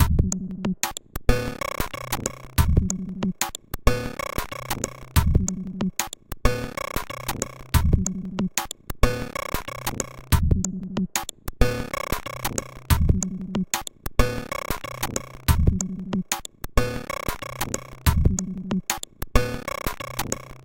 average funky
beat, harsh, industrial, loop, minimal, percussion, techno